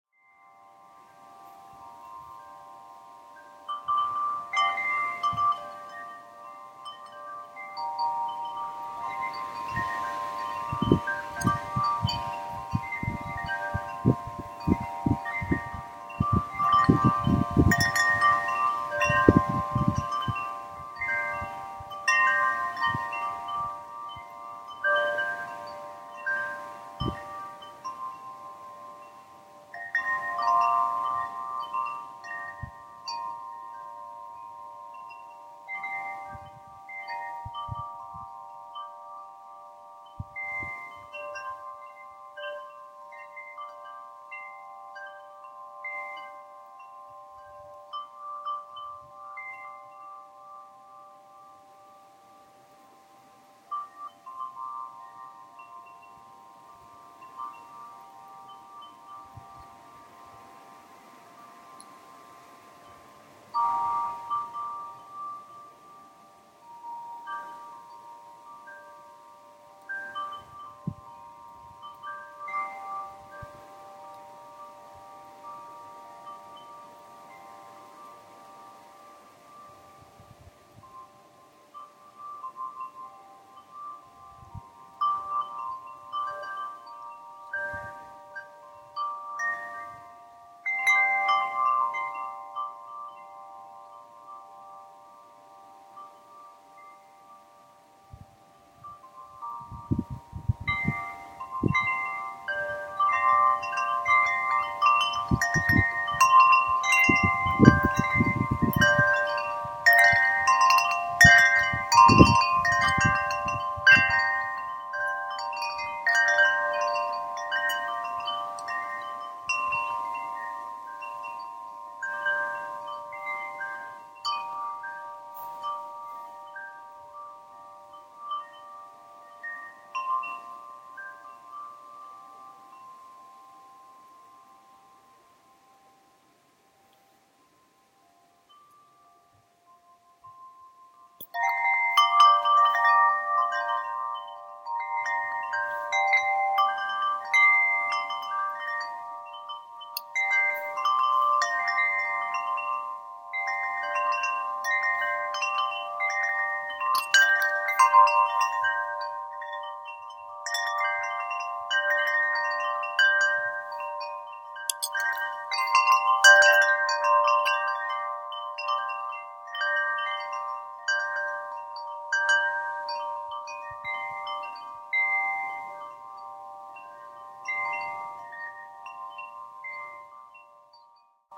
Metal windchimes in a light breeze. Duration: 3 mins
Recorded 17th June 2018, at Elpha Green, Northumberland, England